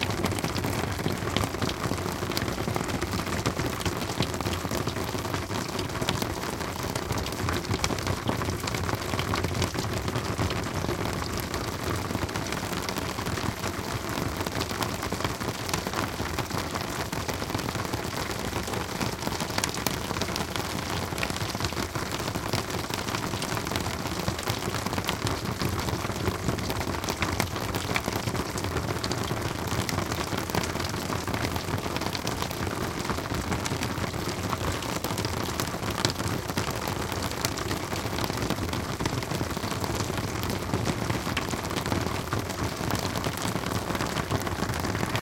dribbling rain (snow melt from roof) [2]
Actually is not rain. It is snow melting down from a roof, falling into puddles and onto a corrugated roof. I placed the microphone (Zoom H2) on different spots in 4channel sorround mode. I just normalized them and mixed them into stereo. Thats it.
In this case the mic is placed on the ground directly under the roof.
You can easily loop the sound without a notable cut in the mix.
cheers, pillo